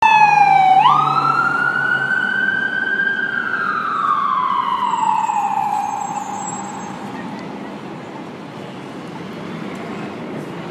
An ambulance in NYC.